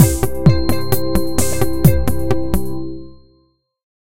Hmasteraz130bpm FeelGoodTime D
feelgood, happy